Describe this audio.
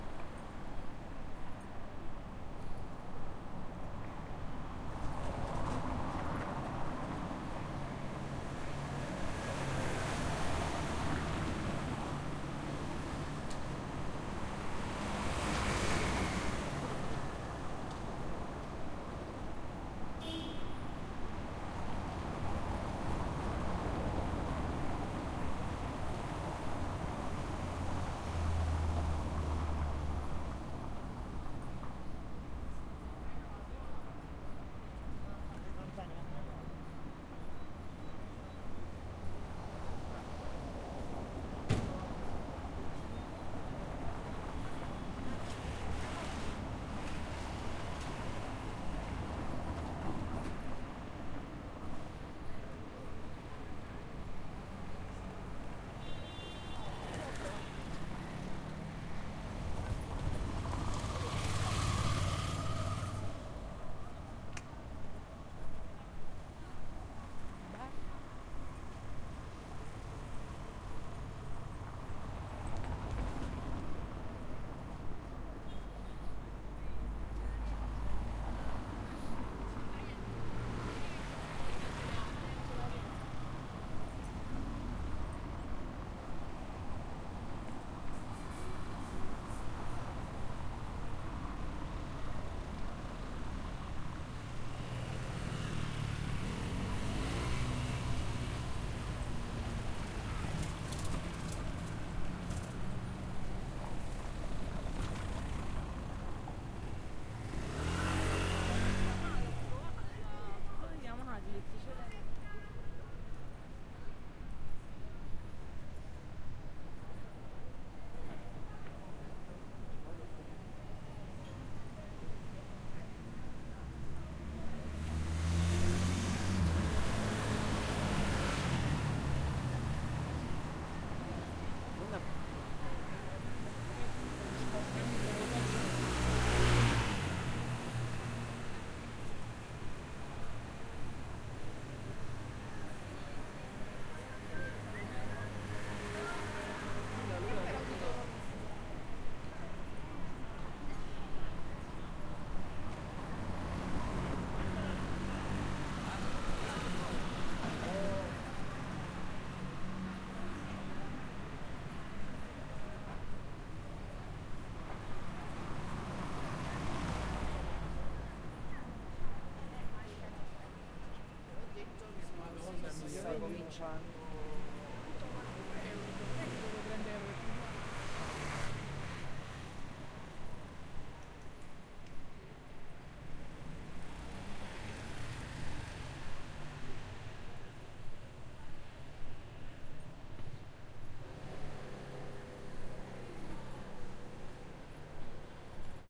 stereo recording of via Toledo (Naples) made with a korg H4n